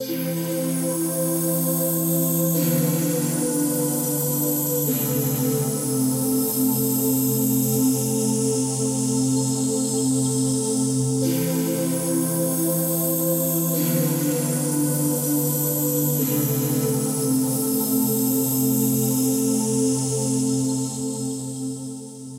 Live - Space Pad 02
Live Krystal Cosmic Pads
Cosmic, Pads